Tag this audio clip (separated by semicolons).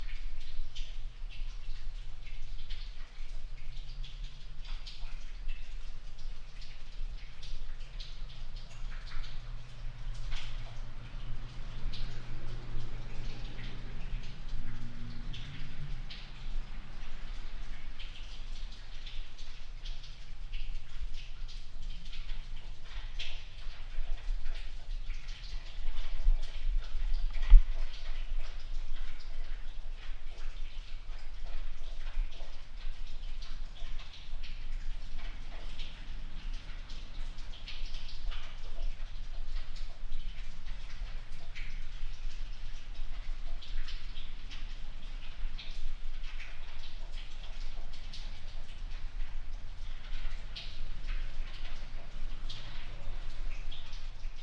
echo; wet; Basement; abandoned; Derelict; reverb; ambient; dripping